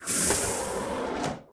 sci fi door sound
Door, Sci-fi